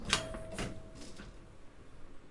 Elevator Ding and Door
An old library elevator's bell is struck, indicating it has reached its target floor, before the doors are opened.
bell, door, elevator, field-recording, opening